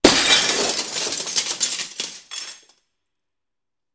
Windows being broken with vaitous objects. Also includes scratching.
breaking-glass, window, indoor, break